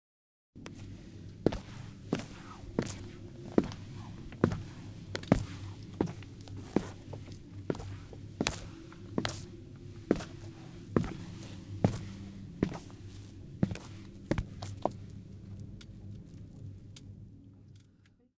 Passos de salto
Sapato de salto alto/Efeito sonoro gravado nos estúdios de áudio da Universidade Anhembi Morumbi para a disciplina "Captação e Edição de áudio" do cruso de Rádio, Televisão e internet pelos estudantes: Bruna Bagnato, Gabriela Rodrigues, Michelle Voloszyn, Nicole Guedes, Ricardo Veglione e Sarah Mendes.
Trabalho orientado pelo Prof. Felipe Merker Castellani.